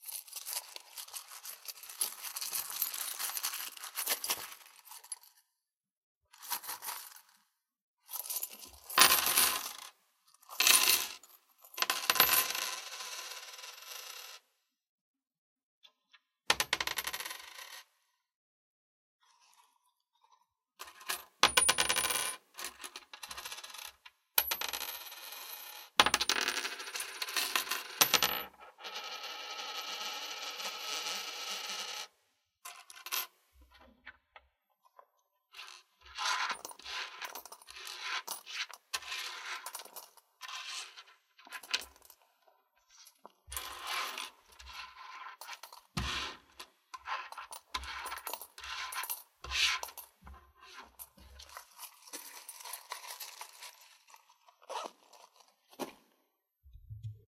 Counting Coins
Coins empties on table and put away
MUS, ching, 152, Coin, dime, spin, SAC, nickle, GARCIA, Penny, quarter, flip, Money